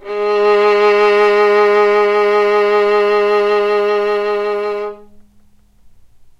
violin arco vib G#2
violin arco vibrato
violin, arco